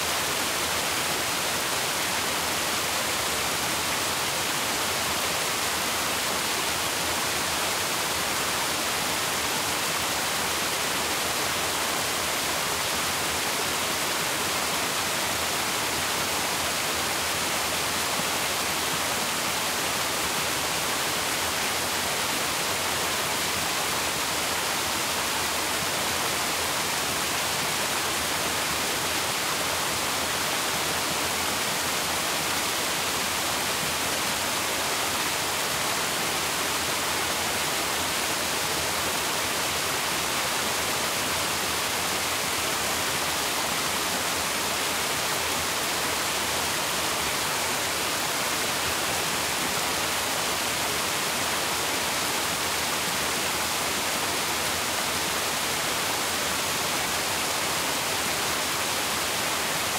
I'd love to hear about the projects you use my sounds on. Send me some feedback.
Waterfall, Dam, Stream, Creek, Flow, River, Nature, Splash, Mortar, Background, Water, Ambience